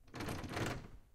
Doorknob rattle 4
Insistent testing of a locked doorknob recorded in studio (clean recording)
doorknob, handle, locked